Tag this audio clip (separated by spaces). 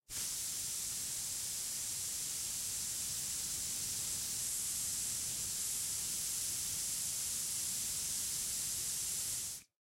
burn burning candle explosion fire flame fuse gun ignite ignition lighter match spark wick